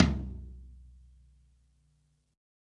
Low Tom Of God Wet 003
drum, drumset, pack, tom, realistic, low, kit, set